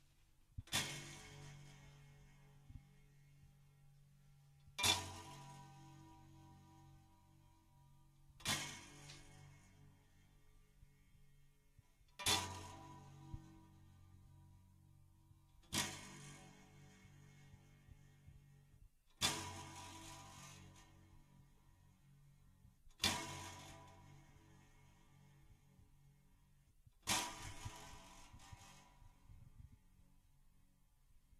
Metallic Strums
A strange metallic strumming sound.
spring, scifi, strums, metallic